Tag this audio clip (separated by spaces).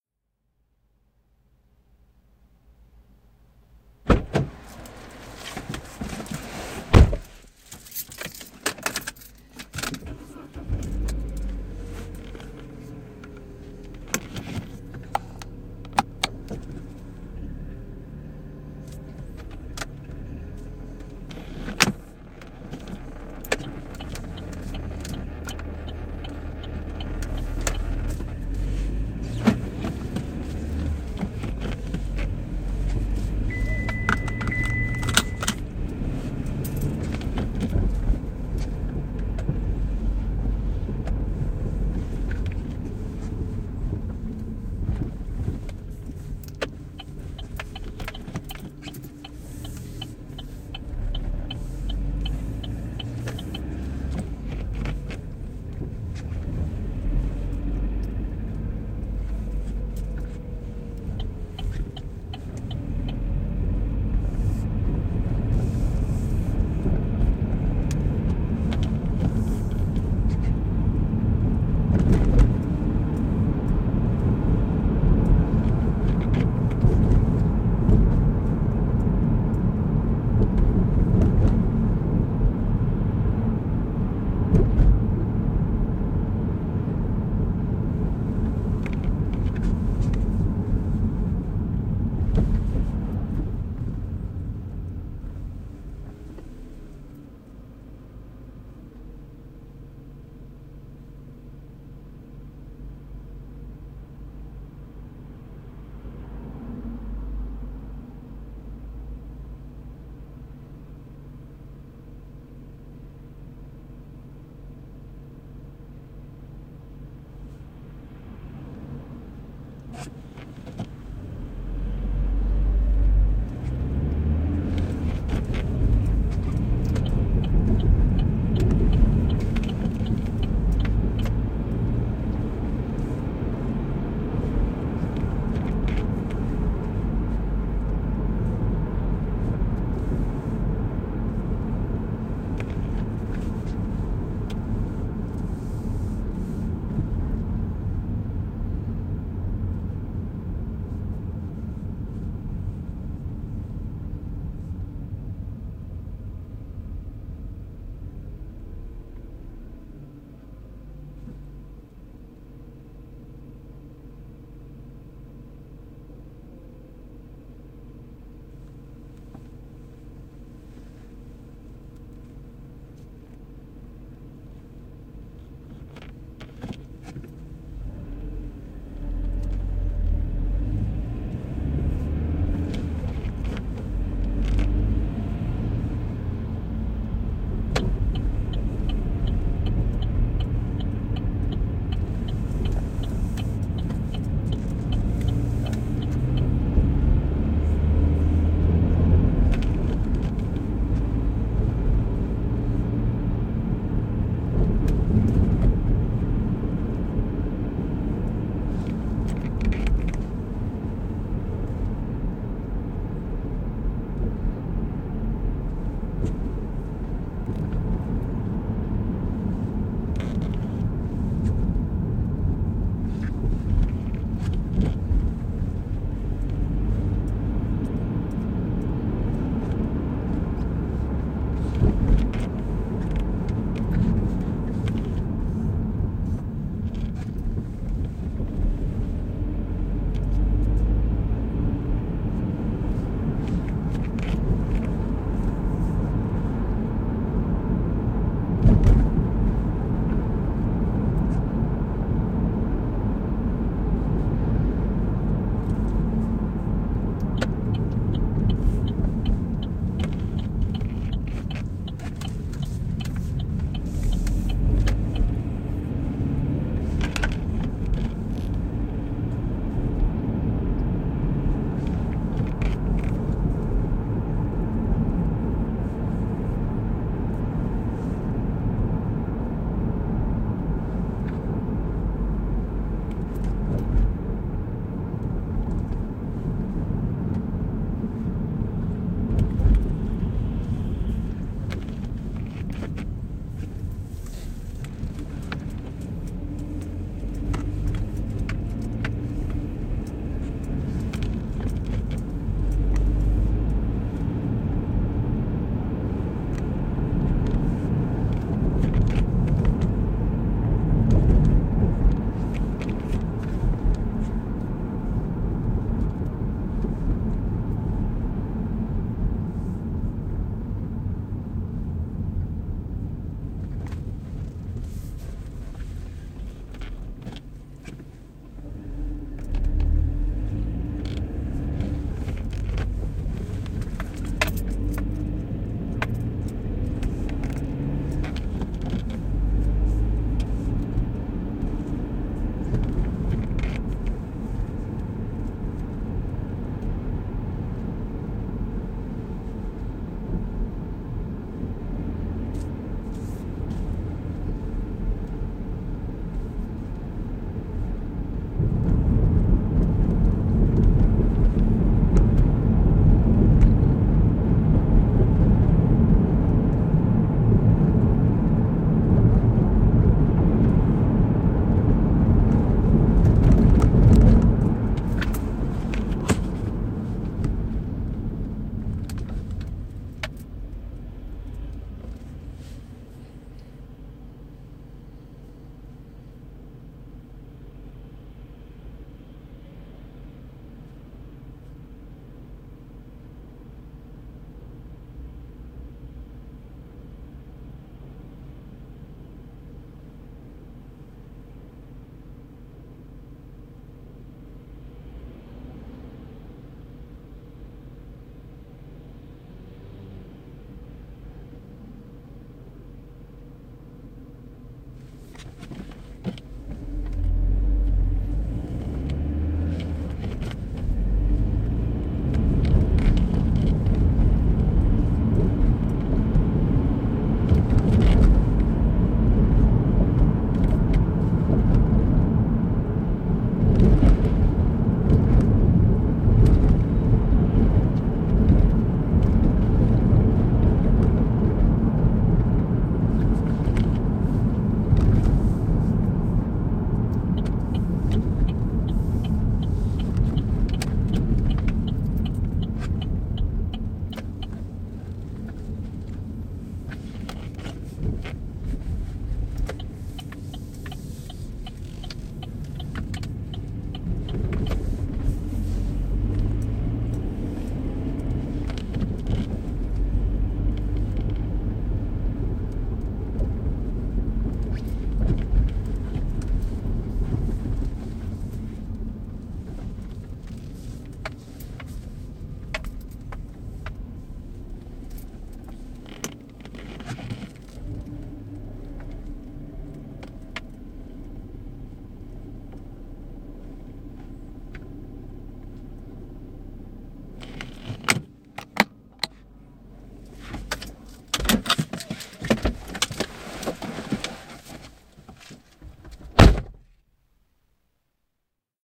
Auto Car Cars Door Driving Journey Vehicle